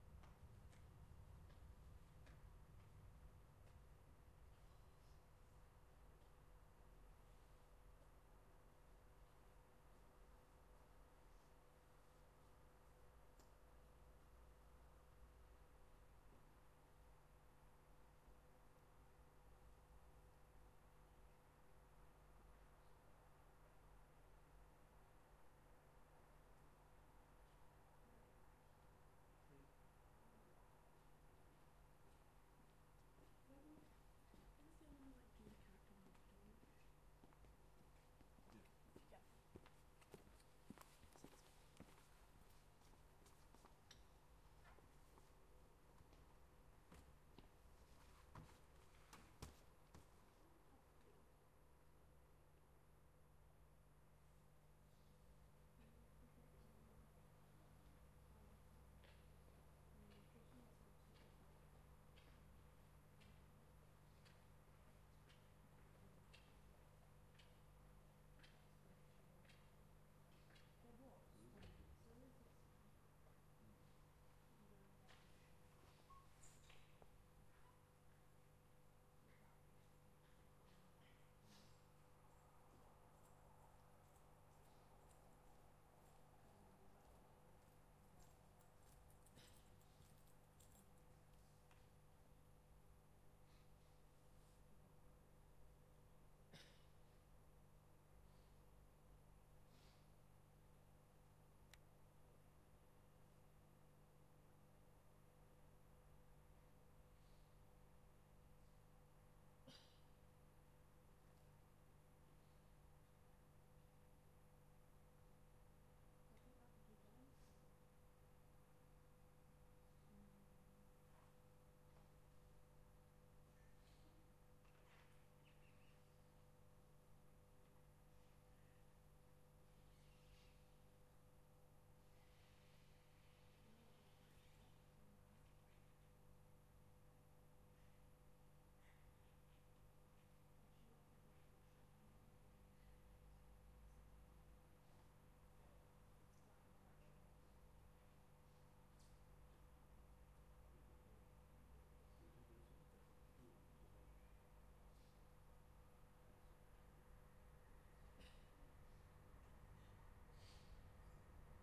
quiet interior station platform
atmos, atmosphere, field-recording, light-rail, metro, quiet, station, suburban, subway, train, train-station, travel, travelling, tube, underground